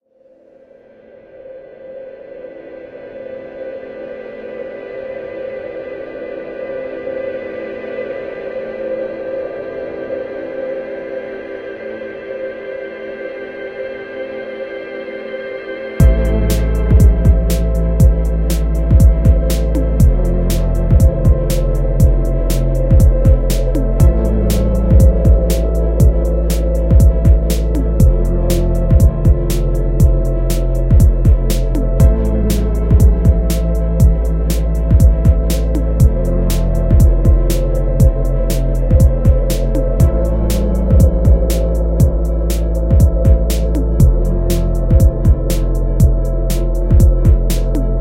Troubled (loopable)
A collection of loop-able sounds from MSFX’s sound pack, “Cassette ‘One’”.
These sounds were sampled, recorded and mastered through the digital audio workstation (DAW), ‘Logic Pro X’. This pack is a collection of loop-able sounds recorded and compiled over many years. Sampling equipment was a ‘HTC Desire’ (phone).
Thank you.
processed, electronic, drone, noise, heavy, synth, msfx, drum, beat, kit, atmosphere, drum-kit, loopable, bass, electro, dark, sci-fi, illbient, dance, loop, ambient, music, pad, suspense